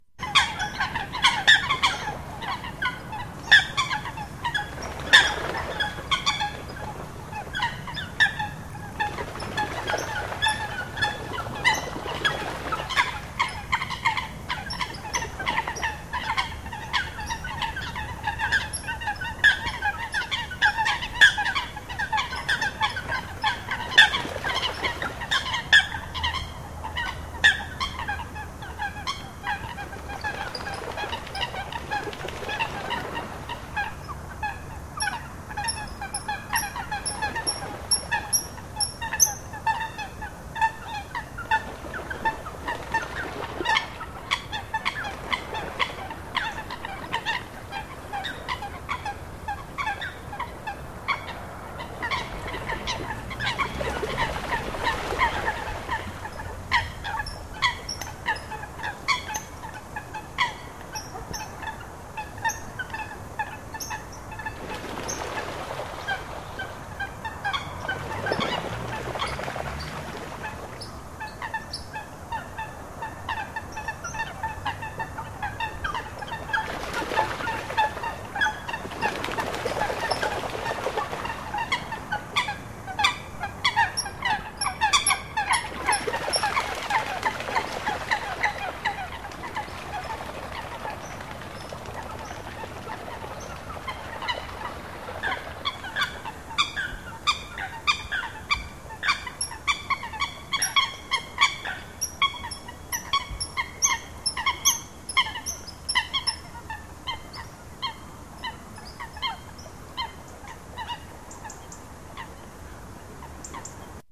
coot concert
A mono recording of a large group of coots (fulica atra) playing in water on a pond near Paris / Marne -la-Vallée. AT3031+Sharp MD-MT80
ambience, fulica-atra, mono, coot, bird, pond, field-recording